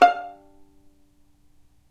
violin pizz non vib F4
violin pizzicato "non vibrato"